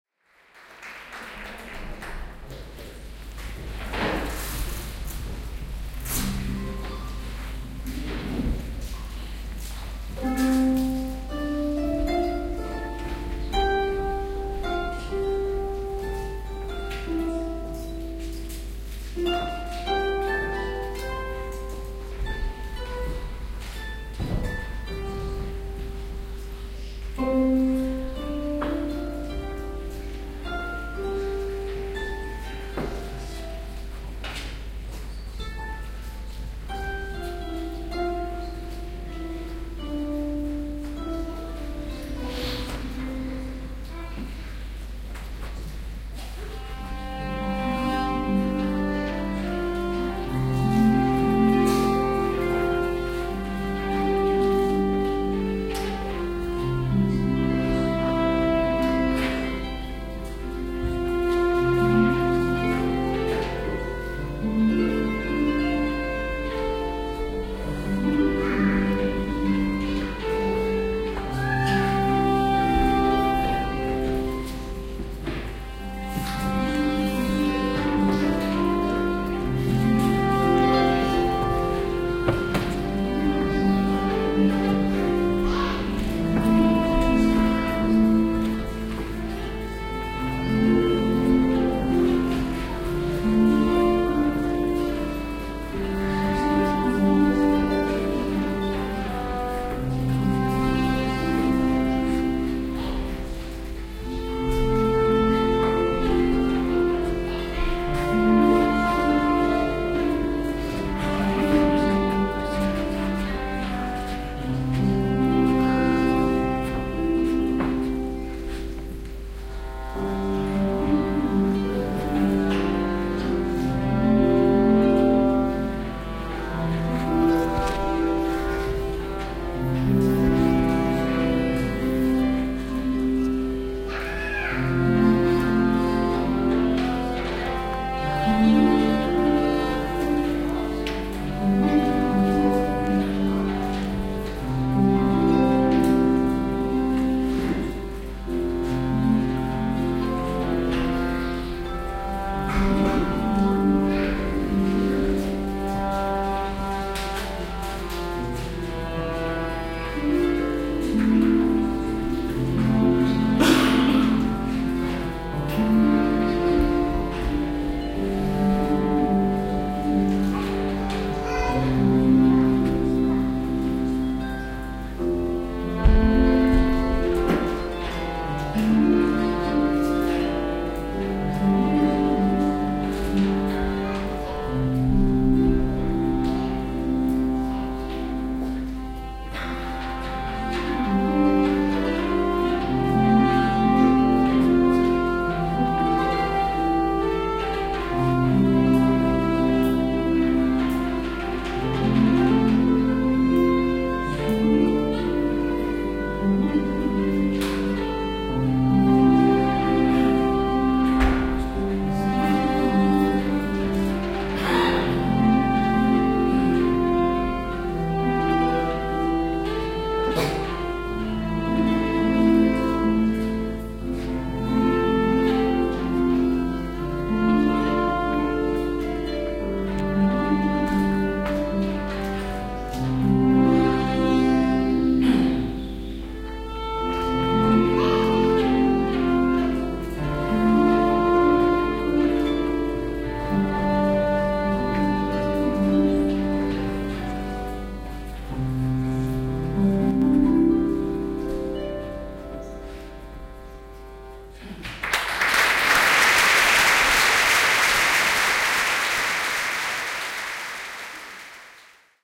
Igrexa Románica Pesqueiras [12 08 13]
Recorded inside Pesqueiras romanesque church, dated from XIII century.
Victor Soto plays a traditional folk galician instrument called Zanfona and Quico Comesaña uses an Harp to play a traditional galician song.
field-recording, galicia